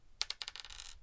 Dice Rolling Sound - WOOD SURFACE
The sound of a dye being rolled, I found that it doesn't matter whether it's a 6 sided dye, a 4 sided dye, a 20 sided dye, or a 60 sided dye, it all sounds the same... and yes I have a 60 sided dye...